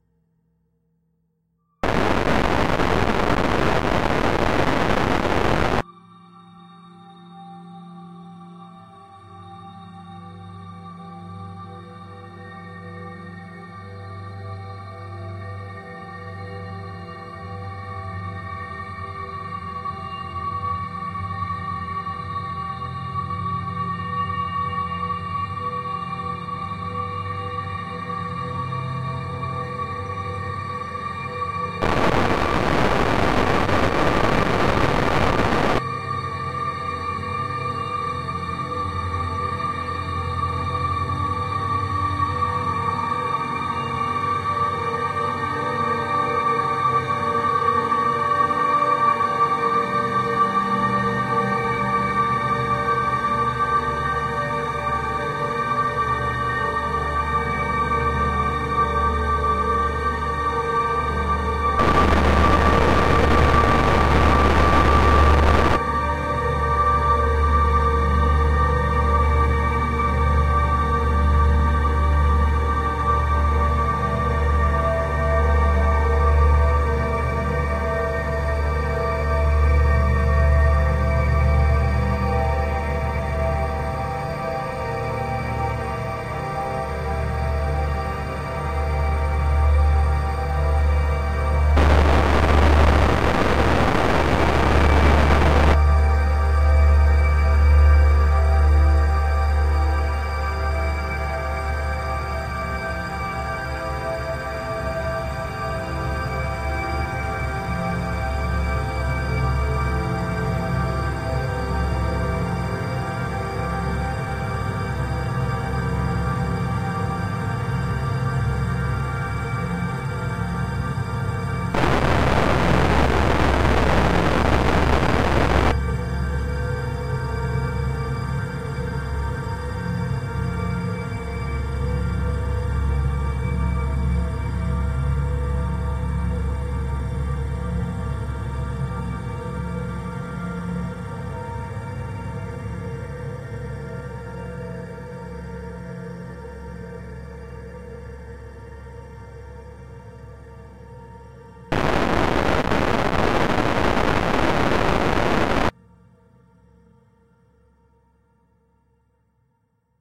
LAYERS 012 - Carbon Based Lifeforms is an extensive multisample package containing 128 samples. The numbers are equivalent to chromatic key assignment covering a complete MIDI keyboard (128 keys). The sound of Carbon Based Lifeforms is quite experimental: a long (over 2 minutes) slowly evolving dreamy ambient drone pad with a lot of subtle movement and overtones suitable for lovely background atmospheres that can be played as a PAD sound in your favourite sampler. The experimental touch comes from heavily reverberated distortion at random times. It was created using NI Kontakt 4 in combination with Carbon (a Reaktor synth) within Cubase 5 and a lot of convolution (Voxengo's Pristine Space is my favourite) as well as some reverb from u-he: Uhbik-A.